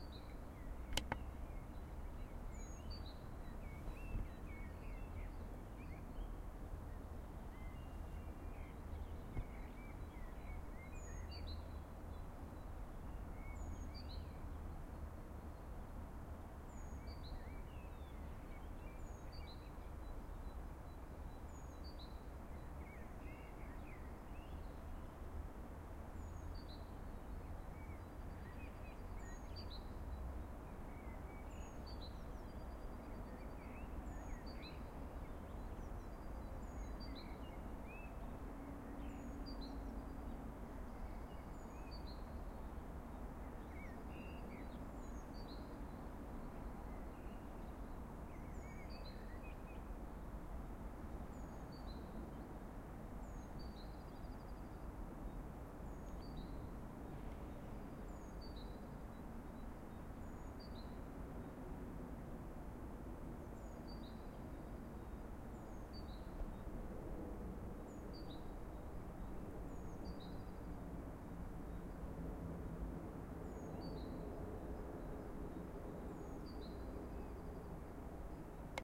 Recorded in Helsinki central park. Spring. Birds singing, distant traffic.
ambience, park, park-ambience, spring, springbirds